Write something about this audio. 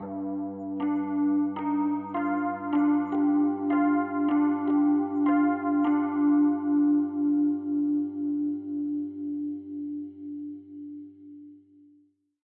Much love and hope the community can use these samples to their advantage.
~Dream.